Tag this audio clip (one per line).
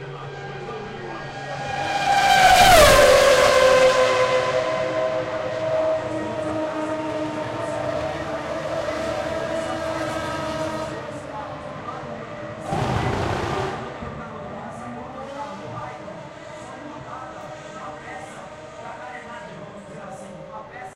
field-recording
h4
gear
vroom
zoom
engine
car
explosion
racing
accelerating
f1
fast